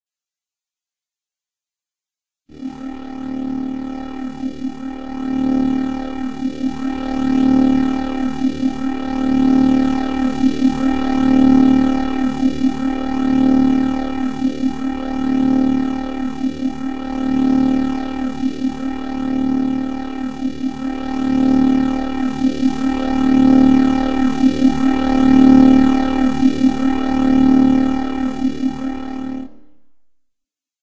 FactoryFusionator is machinery used by the SynGlybits in the mfg. of transportation pods.